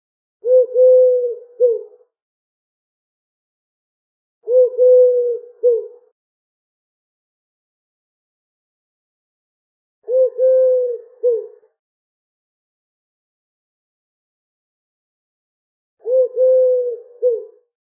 Isolated chant of a common pigeon